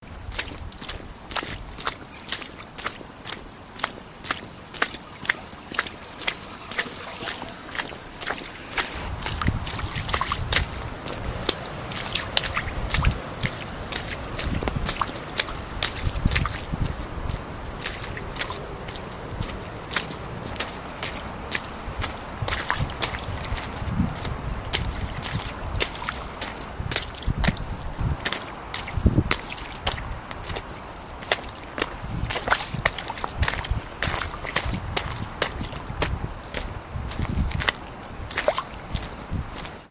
Footsteps, Puddles, B
Raw audio of footsteps splashing in small puddles and some mud. This is a combination of several raw recordings edited together. Apologies for the periodic wind interference.
An example of how you might credit is by putting this in the description/credits:
puddle, footsteps, splash, water, puddles, steps, foot, splosh, step, footstep, splish